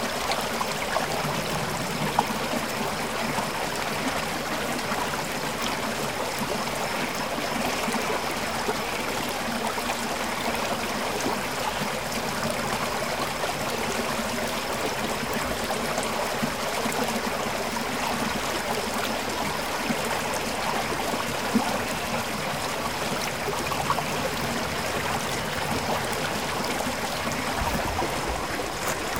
Soft flowing water very close to the river
Sound of water flowing in the river.
agua, flow, fluir, naturaleza, nature, rio, river, water